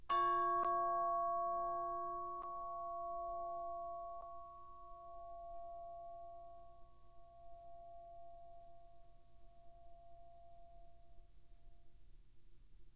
Instrument: Orchestral Chimes/Tubular Bells, Chromatic- C3-F4
Note: C#, Octave 2
Volume: Piano (p)
RR Var: 1
Mic Setup: 6 SM-57's: 4 in Decca Tree (side-stereo pair-side), 2 close
bells, decca-tree, orchestra, chimes, sample, music